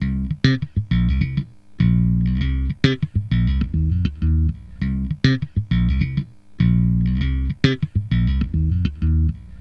SlapBass GrooveLo0p C#m 1
Funk Bass Groove | Fender Jazz Bass
Ableton-Bass, Ableton-Loop, Bass, Bass-Groove, Bass-Loop, Bass-Recording, Bass-Sample, Bass-Samples, Beat, Compressor, Drums, Fender-Jazz-Bass, Fender-PBass, Fretless, Funk, Funk-Bass, Funky-Bass-Loop, Groove, Hip-Hop, Jazz-Bass, Logic-Loop, Loop-Bass, New-Bass, Soul, Synth-Bass, Synth-Loop